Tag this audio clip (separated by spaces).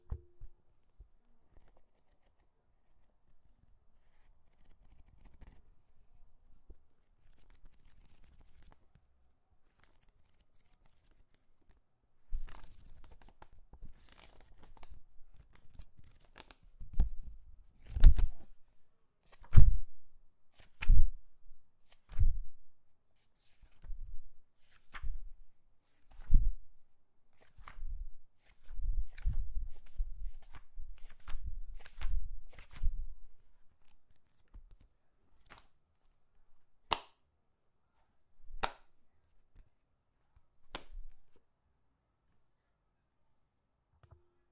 book close leather open pages read reading shut slam turn turning